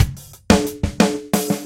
I made these loops about 1yr ago for a project I was working on. I know how difficult it is to find free drum loops in odd time signatures, so I thought I'd share them
180bpm, 4, 5, acoustic, drum, jazz, kit, loop, polyrhythm